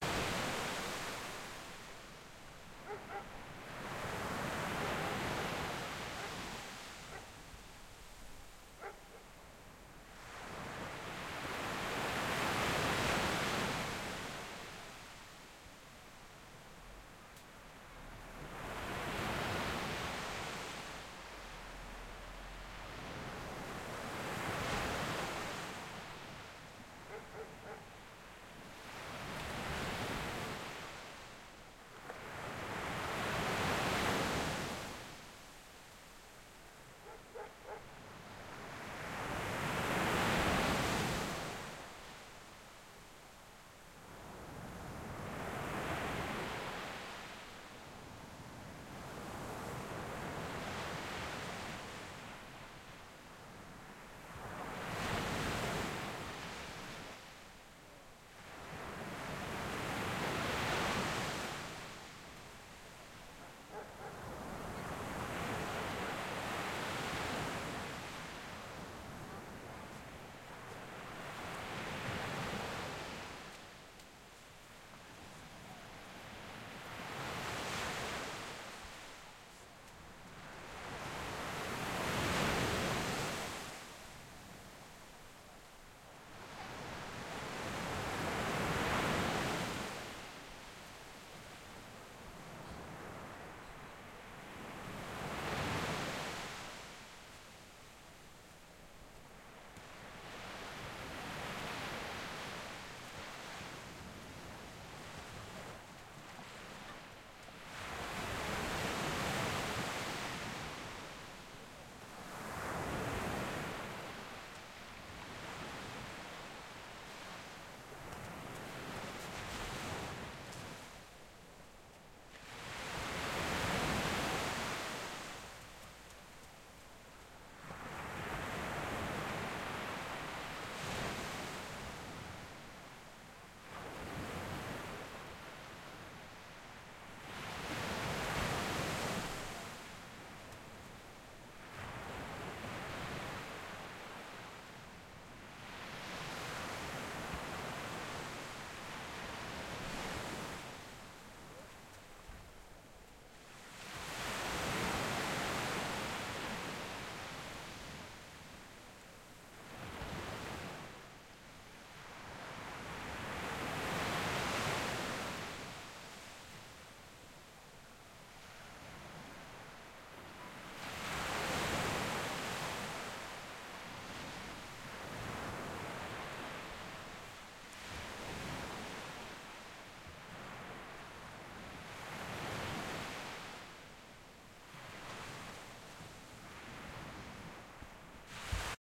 mar llafranc mid perspective